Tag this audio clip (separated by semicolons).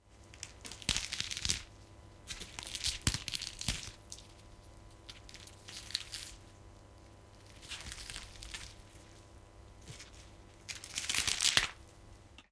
vegetable; foley; breaking; bones; cauliflower; pulse; horror